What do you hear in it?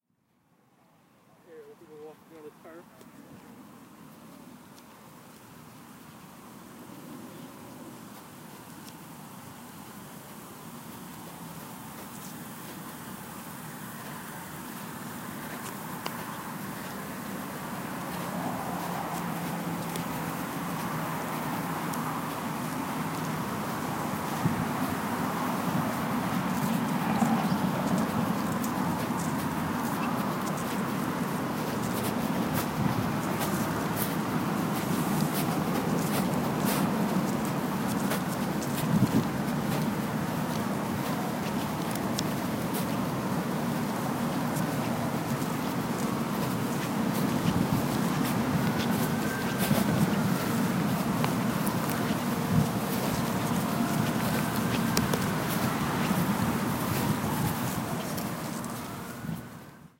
A 1 minute clip of me walking around the football field of Loyola High School. In the background I heard cars, birds, busy Los Angeles traffic, sprinklers, other people, a plane.